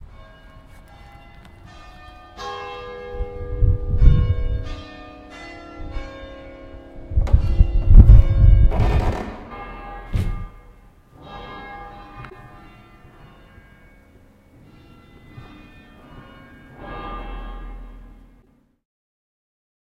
ChurchNoise BellInsideandOutofChurch Mono 16bit
Transitioning of bell ringing outside and inside church
bit, 16